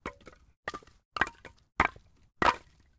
Throwing logs onto... well, other logs.
Internal mics of a Tascam DR-40.
fall, hit, impact, log, throw, thud, wood